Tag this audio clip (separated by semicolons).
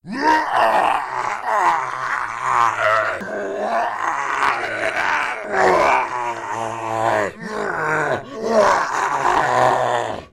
alien; attack; bioshock; crazy; creepy; criminal; fear; horror; left4dead; monster; mutant; mutated; mutation; scary; sci-fi; screaming; terror; thrill; zombie